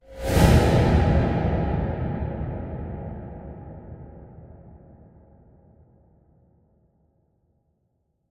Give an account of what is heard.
Metal Suspense
A suspenseful riser made from a wet mix of a rate-shifted bed frame being hitted and reversed after it has been "glued" or rendered as is, producing a deep, "Mega-Marvin" similar sample. A sample that was created from utilizing chromatic tones, stretching, reverb and the use of the wet/dry filtering to get the mix "just right".
If you aren't aware of what the Mega-Marvin is. Mega-Marvins are essentially instruments containing extremely heavy string, woodwind and varying density of materials that generate strong noises, trailing ambiances and brass-like sound qualities quite suited to the horror genre, generating a daunting and sorrowful sounds. Very fascinating stuff, look it up if you can - they're big and quite technical.
string, ringing, riser, metal, frame, suspense, reverse, bed, soft